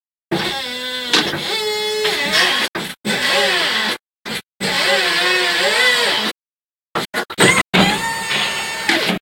My forklift recorded using my phone at work. If you cut out sections, scramble them and fool around with the speed in the sound, I think you can get a great variety of pneumatic and robot-sounding sounds out of it.